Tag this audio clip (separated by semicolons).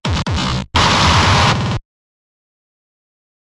deathcore; e; fuzzy; glitchbreak; h; k; l; love; o; pink; processed; t; y